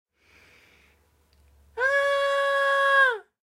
52-grita tontsmnete
Sonido realizado para trabajo universitario tipo Foley,
Siéntase con la total libertad de Descargar y modificar este audio sin necesidad de acreditarme.
UPB
antiguo, Campo, old, rupestre, Rustico